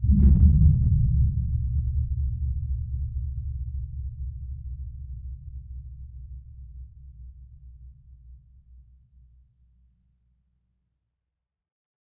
DISTANT EXPLOSION 02
The simulated sound of a faraway explosion. Example 2 of 2
boom, distant, explosion, thunder